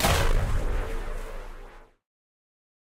Energy Hit 3
The energy hit for Energy Bounce 4. Obliterating effect.
matter, sci-fi, energetic, processed, orb